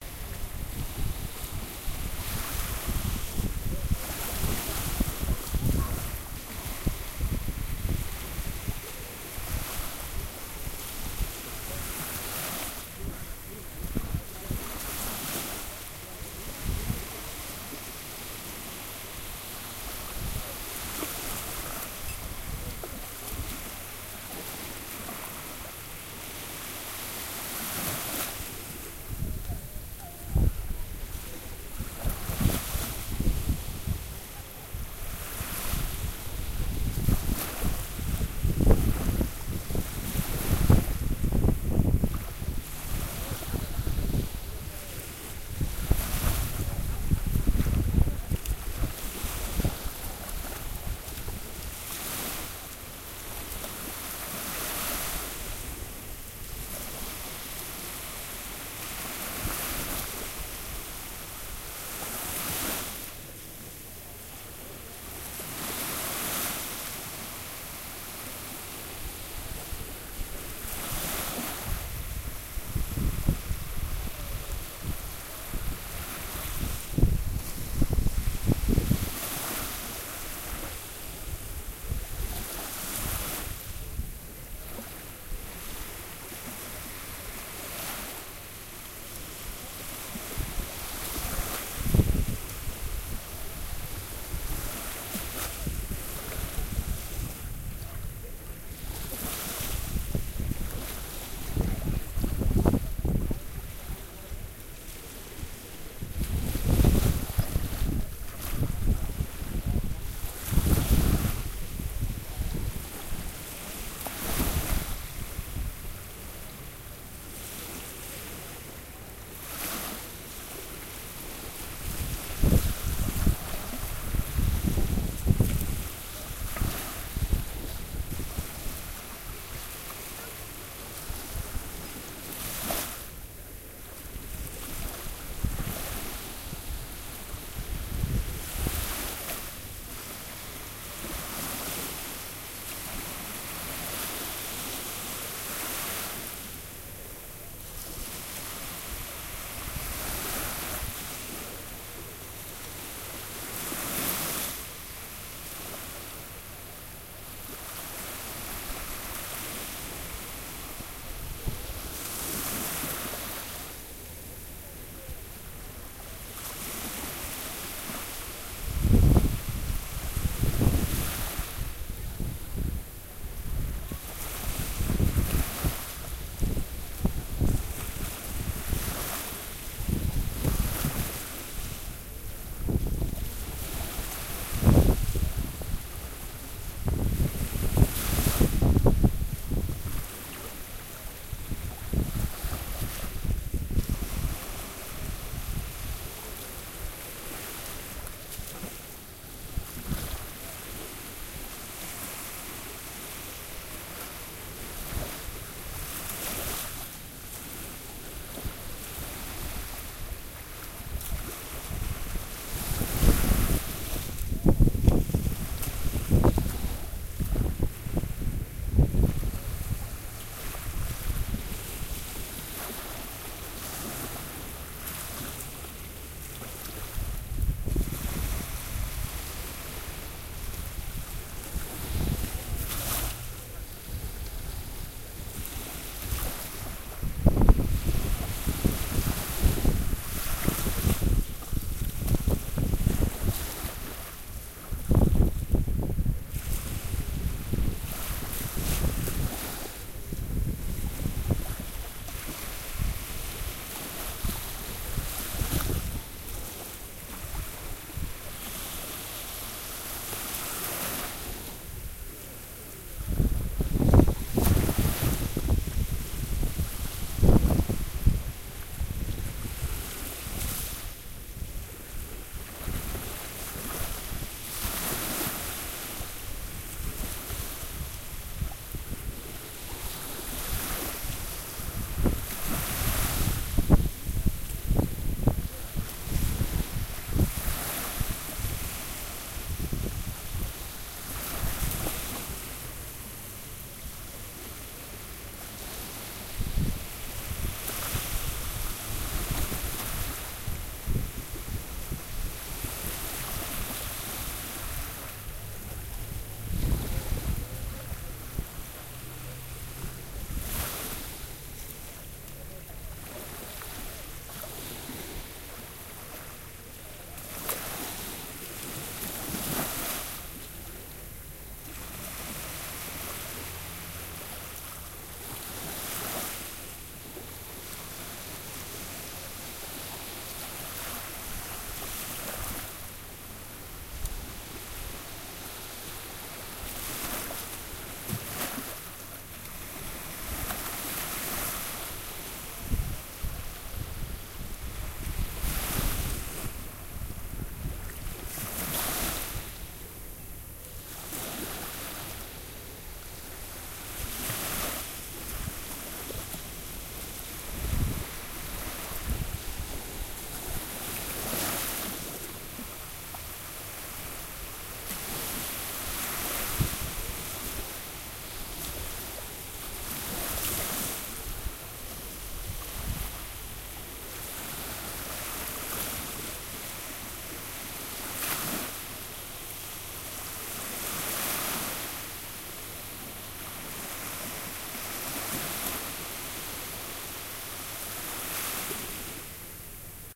Evening beach sounds
7 minutes of beach sounds in Oroklini, an area of the city Larnaca in Cyprus.
The recording took place on the 23rd of June 2020 at about 9 o'clock in the evening.
Unfortunately, there was some wind around, but I hope it's not disturbing to the ears.
Recorded with a nokia 6.1 smart phone.
sea, Cyprus, Mediterranean, evening, Larnaca, field-recording, waves, Oroklini, beach